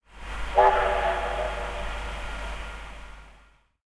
Ja Loco 03
New Zealand Ja Class Locomotive coming into, stationed at and leaving Hamilton Station. Homeward bound to Auckland (Glennbrook) after a joint day trip to National Park and back. Recorded in very cold conditions with a sony dictaphone, near 10pm NZST.